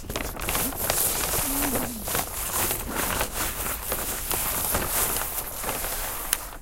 sonicsnaps EBG 10
Plastic canvas.
Field recordings from Escola Basica Gualtar (Portugal) and its surroundings, made by pupils of 8 years old.